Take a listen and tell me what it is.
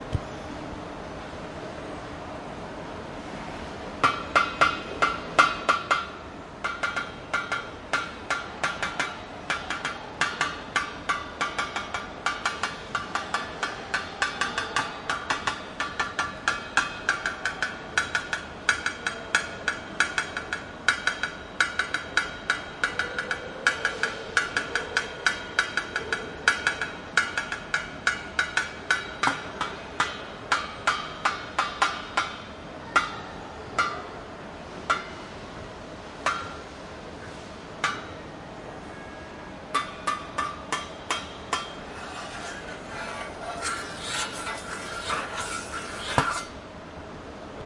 trommeln auf töpfen
Some drumming on pots.